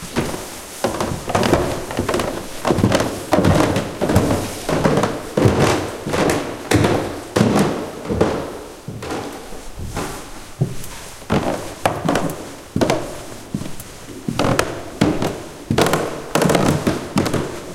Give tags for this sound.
field-recording; wood

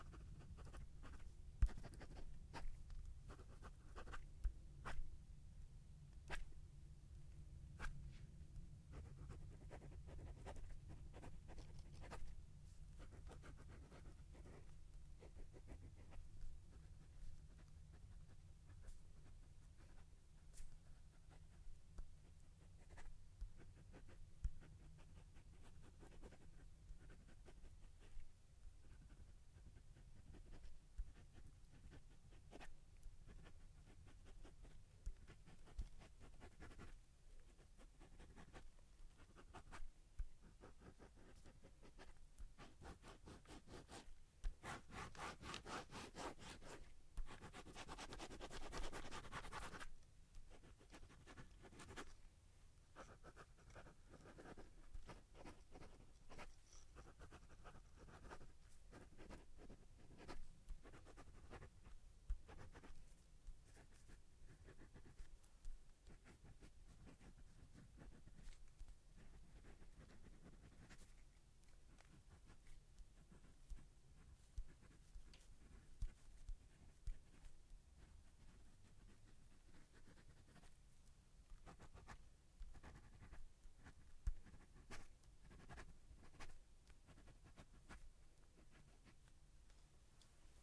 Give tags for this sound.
cursive; paper; pen; scribble; writing

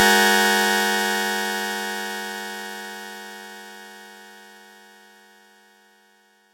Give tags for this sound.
effect; sound; game

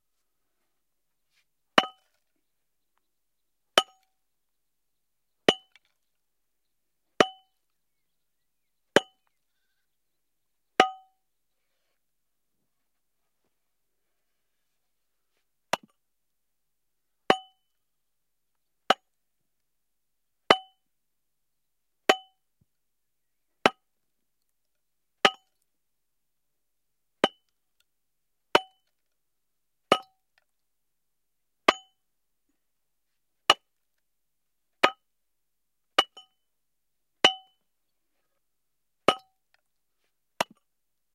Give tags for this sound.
bedrock; digging; dry; field-recording; granite; mandrill; mono; pick; pickax; rock

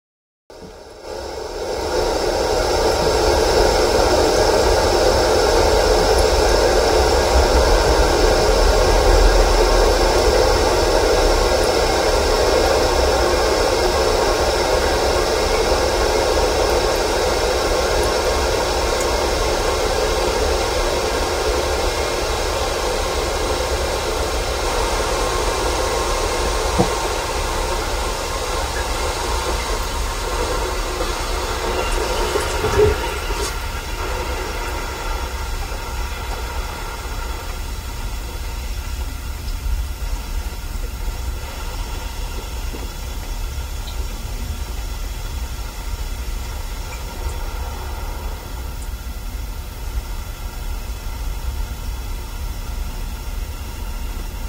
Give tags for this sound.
stove; burner; gas; clicking; fire

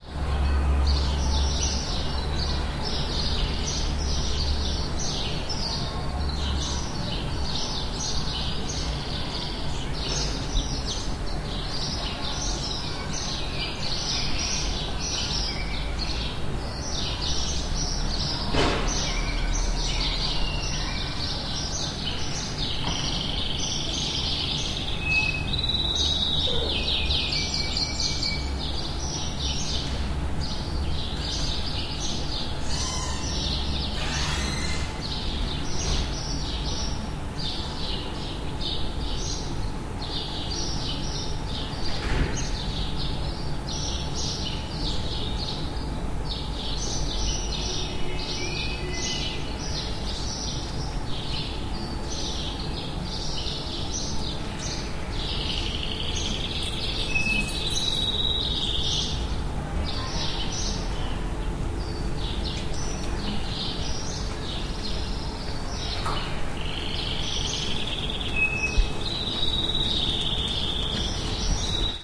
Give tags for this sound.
spain; street

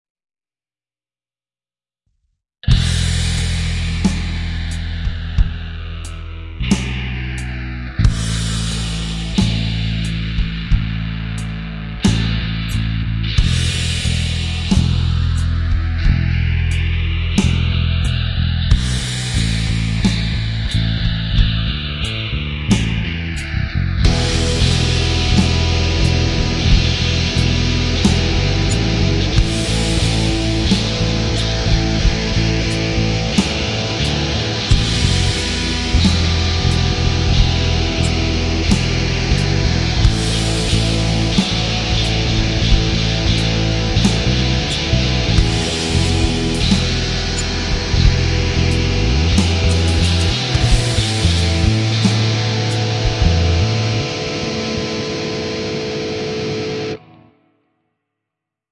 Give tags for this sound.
evilish; trap; Danzigish

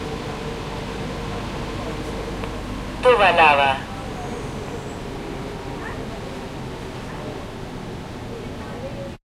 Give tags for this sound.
1; chile; estacion; estaciones; linea; metro; santiago; station; subway; tobalaba; train; tren; uno

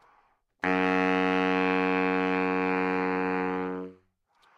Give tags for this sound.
baritone; Fsharp3; good-sounds; multisample; neumann-U87; sax; single-note

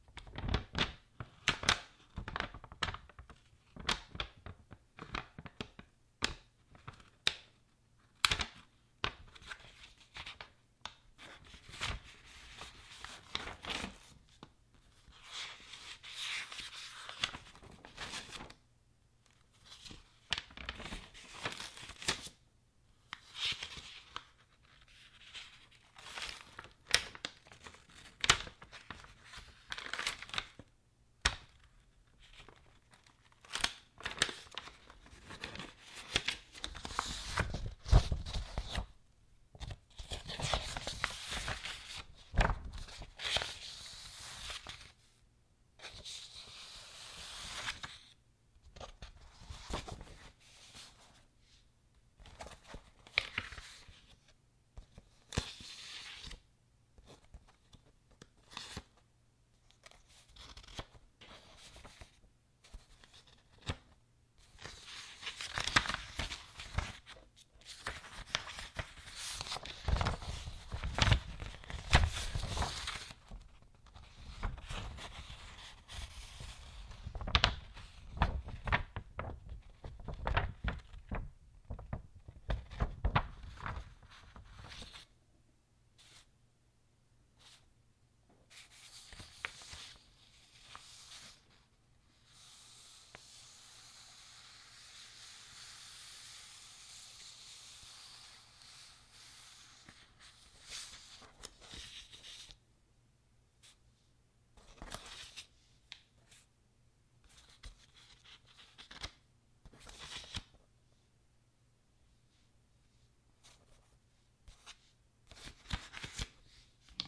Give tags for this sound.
page; magazine; turning; books; news; pages; shuffling; flick; shuffle; book; read; newspaper; reading; turn; paper; flip

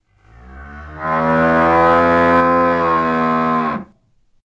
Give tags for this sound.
cow; moo; dramatic

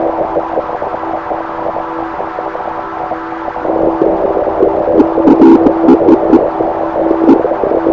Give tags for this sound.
ambient; field; noise; recording; sample

